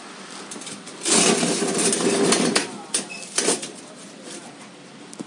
a metal table
heavy, metal, table